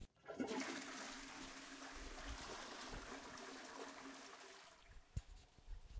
A toilet flush is activated and the water splashes.
splashing, toilet-flush, water